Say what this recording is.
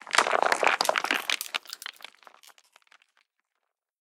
S O 1 Rocks Falling 01
Sound of small rocks hitting the ground. This is a mono one-shot.
Drop, Falling, Foley, Impact, Rocks, Stones